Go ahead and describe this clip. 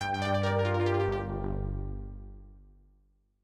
PC Shutdown Sound XD
Simple sound I made using sounds from Roland MT-32
close, computer, online, roland, shut-down, sound, startup, tone, video-game